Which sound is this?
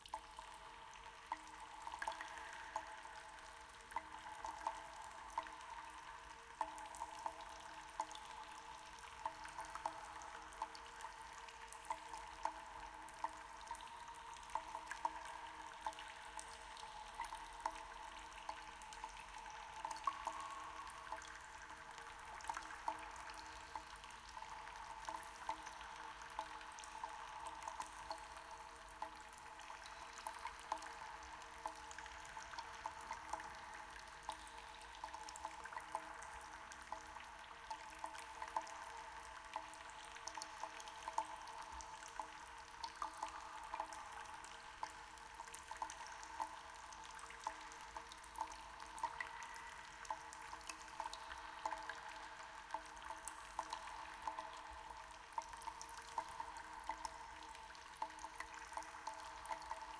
The sound of a fictional water cave.
Be aware that this is an artificial sound effect and not a field recording!